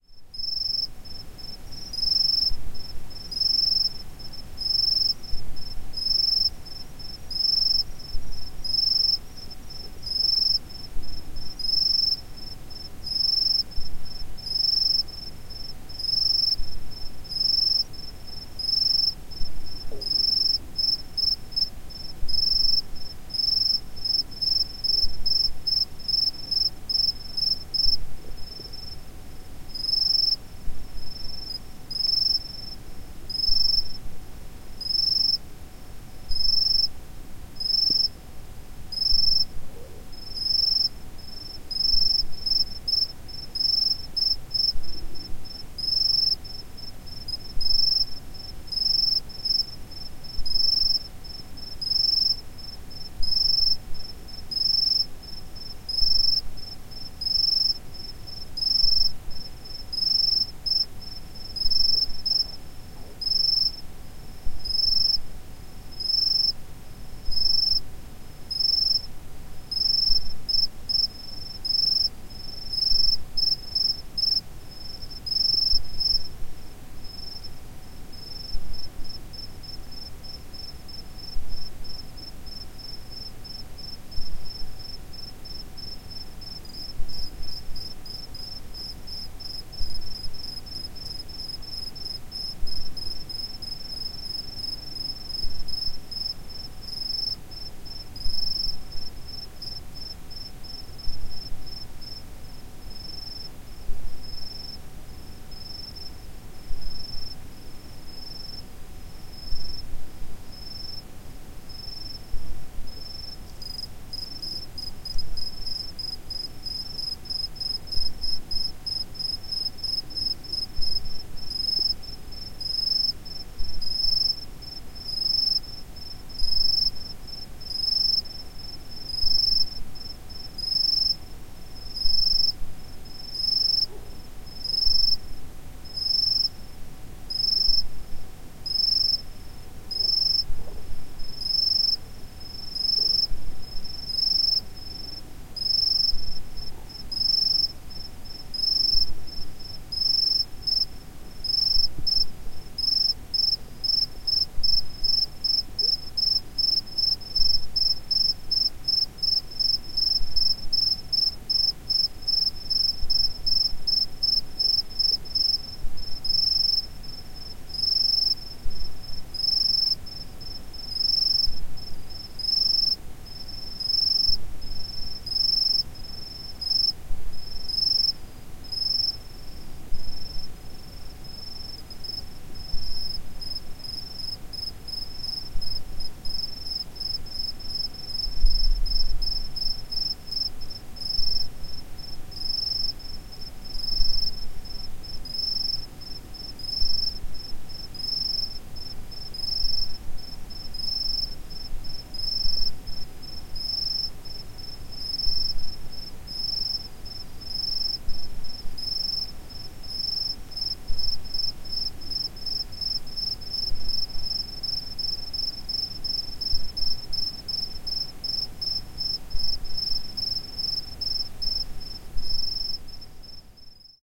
Japangardi japangardi! The sound of distant crickets chirping in the night air. The Warlpiri word for crickets is japangardi japangardi.